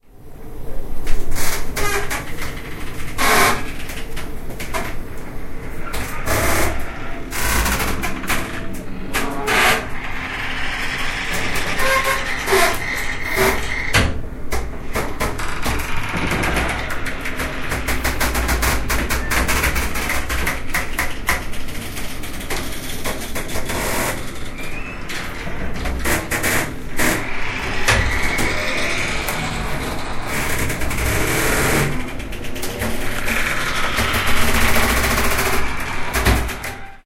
charlotte,silke&cassie
This is a result of a workshop we did in which we asked students to provide a self-made soundtrack to a picture of an "objet trouvé".
bruitage, field-recording, workshop